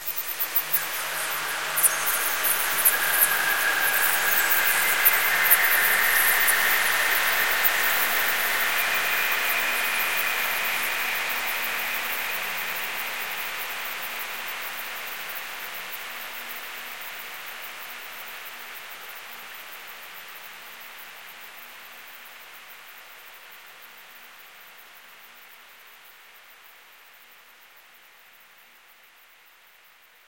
bright ambient effect
hifrequency, skewell, sweeps